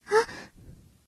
Enjoy! File originally named as "[びたちー]少女「はっ」" In Japanese.